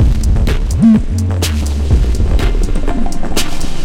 mushroom disco 2
breakbeat, distortion, loop